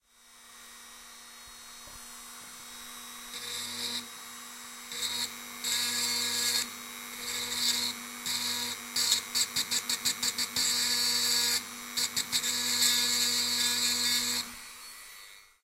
Dremel machine sound. Hand held rotary machine sound in contact with a metallic surface. Sound Recorded using a Zoom H2. Audacity software used by normalize and introduce fade-in/fade-out in the sound.
dremel, Hand, Held, machine, rotatory, UPF-CS12